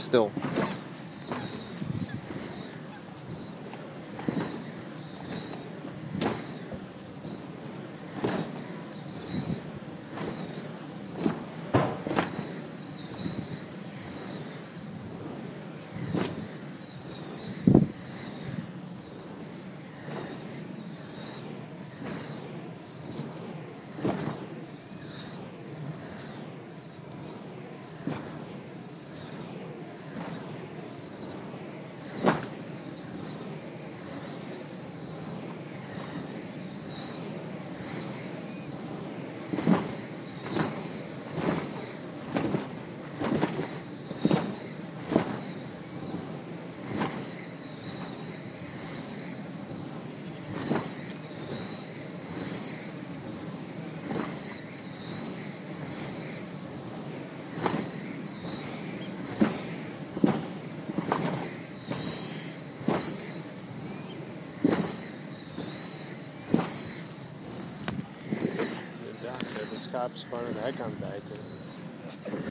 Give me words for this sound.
Windmill Spinning in Dutch countryside (Veendermolen in Roelofarendsveen)
Mono recording of a traditional Dutch windmill called De Veendermolen, which is located in Roelofarendsveen (village), Kaag en Braassem (municipality), Noord-Holland (province), Netherlands (country).
Recorded in the summer of 2011 with my iPhone 4 (Blue FiRe app).